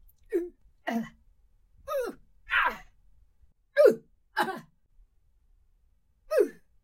goblin fighting
Some fighting sounds (voicing) a goblin might make. Recorded on Zoom H2, only effect: raised voice by three semi-tones.
agression, attack, fight, gnome, goblin, gollum, imp, oof, whump